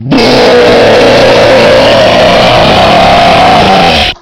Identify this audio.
This is a Scream I made with a sound recorder and Audacity.
My microphone is:
Logitech HD Webcam C270
The microphone is on the webcam (obvious).